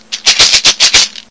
4 shakes of a small
shekere
with a higher pitch. Recorded as 22khz
handmade, gourd, shaker